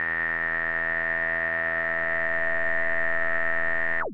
Multisamples created with subsynth using square and triangle waveform.